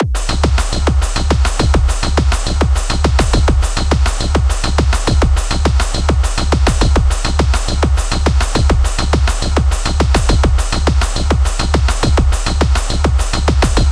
real techno
hard, techno